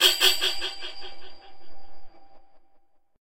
holly sword1
blade, game, movie, slash, sword, video